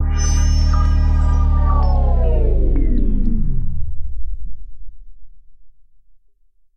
An ending of my unused old record. Can imitate stop of some machine, etc..
effect, ending, fx, stop